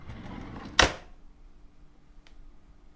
Drawer Opening
The Sound of Opening a Kitchen Drawer Filled With Forks , Spoons & Knives.